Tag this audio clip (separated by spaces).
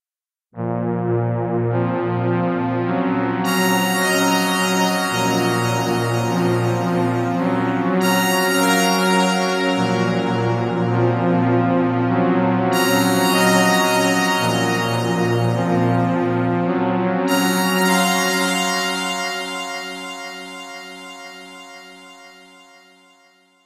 ambience ambient atmosphere background bridge dark deep drive drone effect electronic emergency energy engine future futuristic fx hover impulsion machine noise pad Room rumble sci-fi sound-design soundscape space spaceship starship